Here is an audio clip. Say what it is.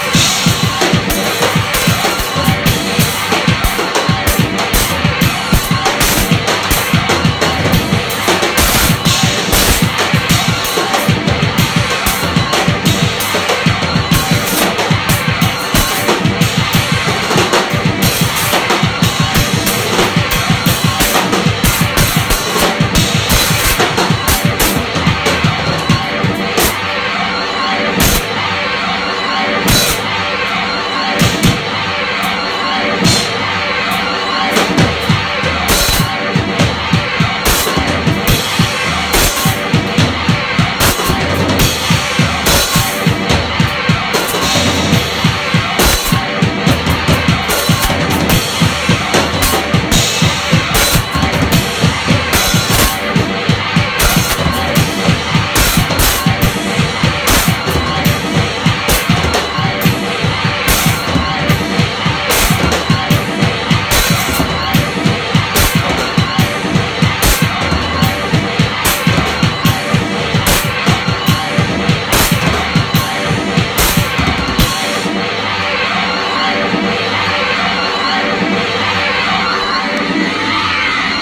mindflayer style beats
guitar, glitch, loops, drums, live